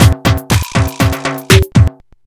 Food beats 4
Roland MC-303 drumkit.
roland, corny